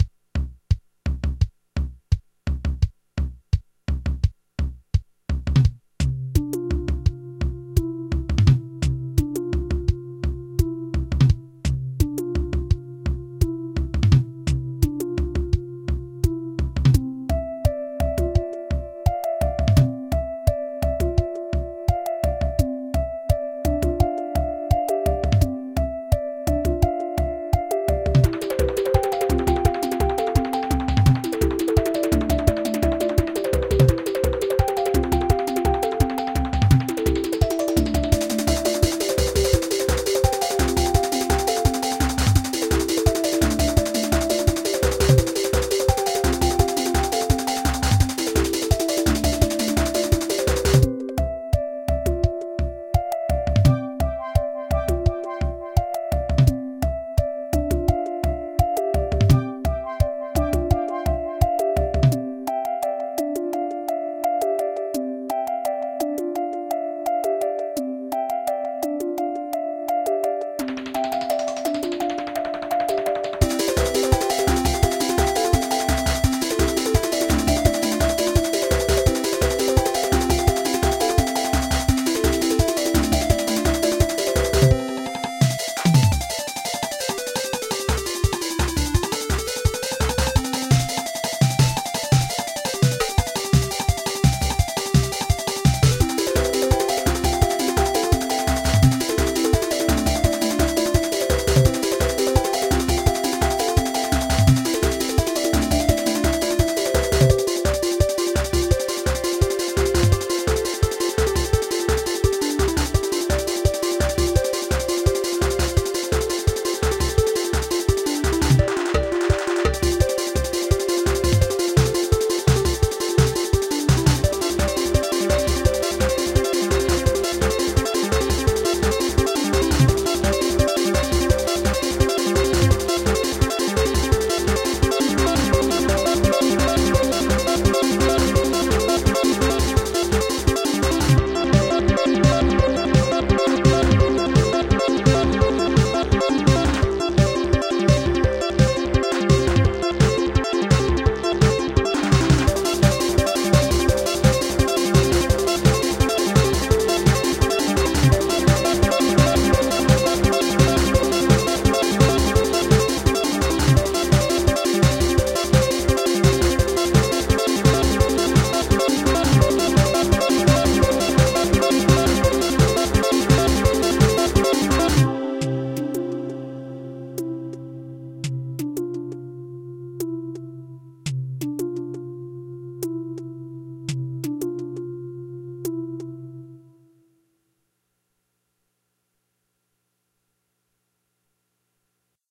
first track made with renoise sequencing hardware. drums are from yamaha rx11v. fun lil jam
303, acid, bells, braindance, dance, electro, electronic, fun, happy, hardware, jam, jump, loop, magical, mc505, oldschool, rave, renoise, rephlex, rx11, spiderlips, synth, techno, upbeat, wisp, x0xb0x